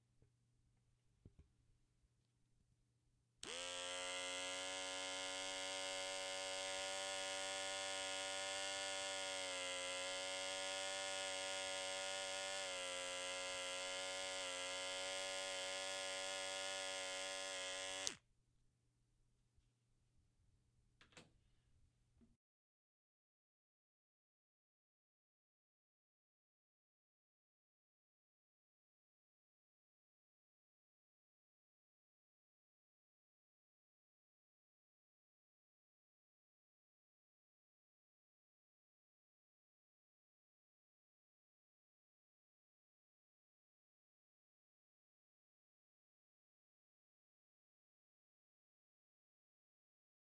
untitled toothbush
toothbrush, field-recording, automatic